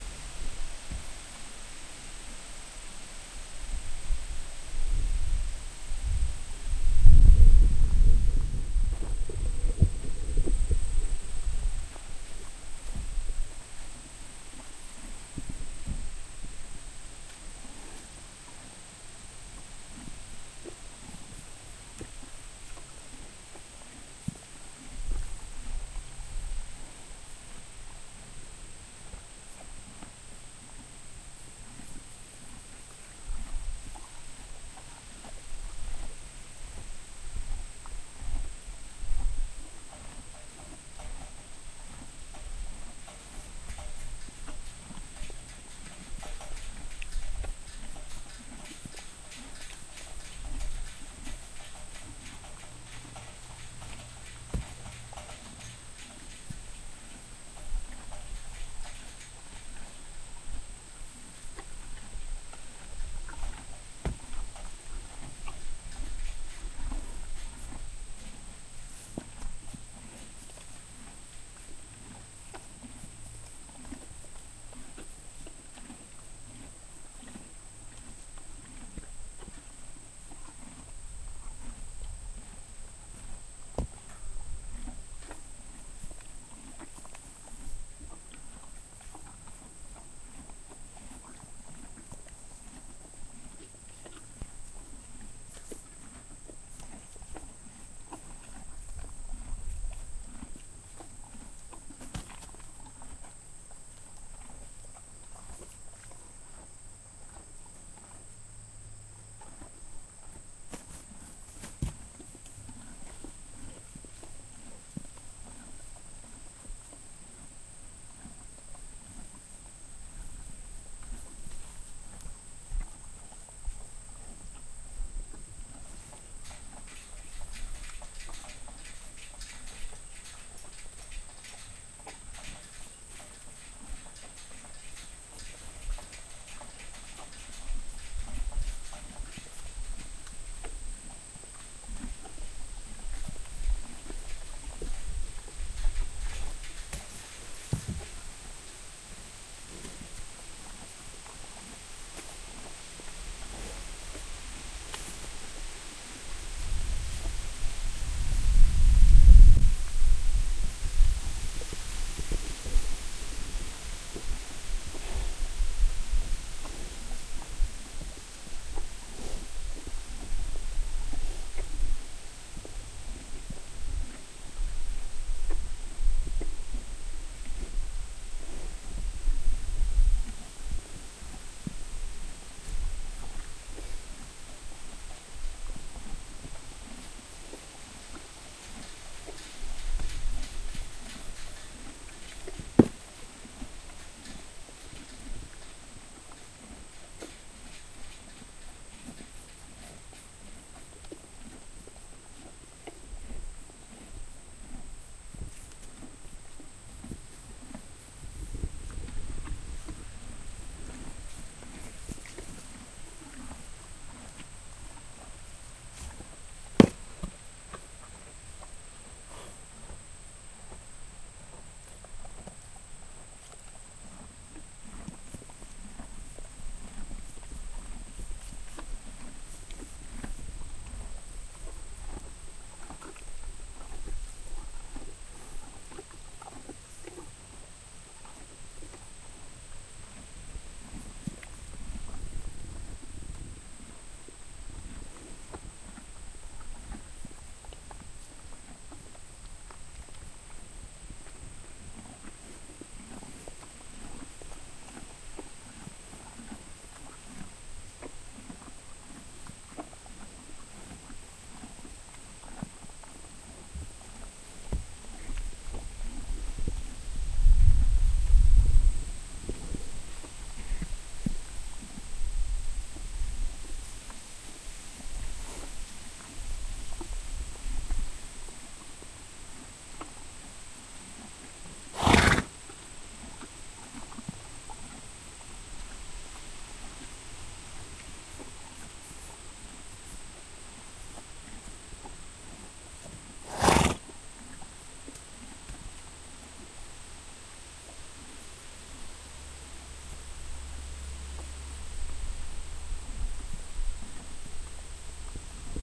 HORSE EATING GRASS FARM AMBIANCE
Horse grazing in pen. Metal fence tinging in the wind. A bit of extraneous wind noise here and there, but largely clean enough to EQ out the rough stuff. Summer farm ambiance. A couple of good snorts at the end.